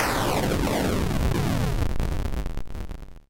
Explosion with weaker repetitions
Created using Chiptone.